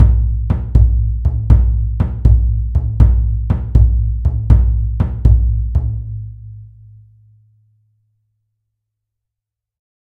Cross-beat for practice